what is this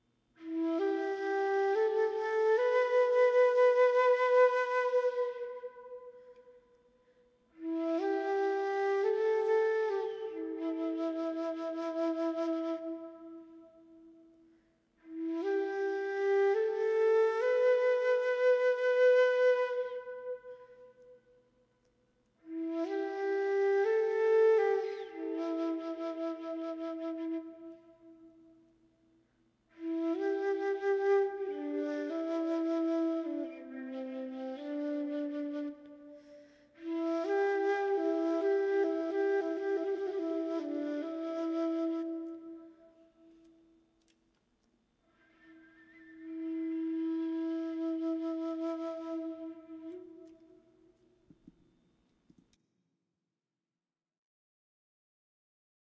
flute2 katarina rose
A flute clip from a song I made ages ago.
Behringer mic (not condenser), Adobe Audition DAW, m-audio interface
Post recording cropping and reverb done in fl studio
flute, goth